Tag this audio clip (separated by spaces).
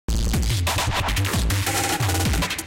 ambient glitch idm irene irried jeffrey spaces